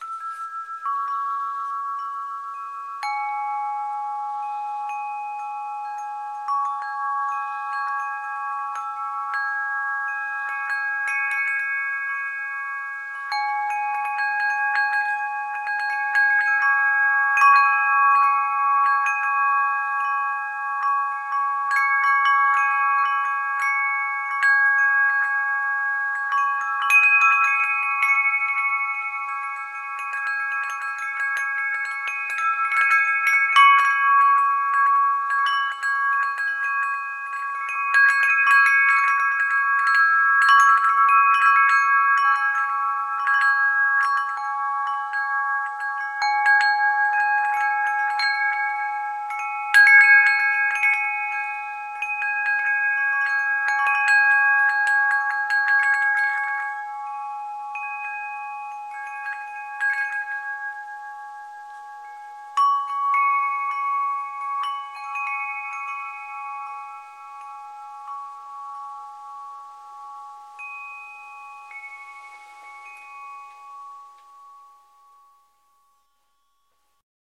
barneys chimes2
Windchimes
Recorded on Zoom H4n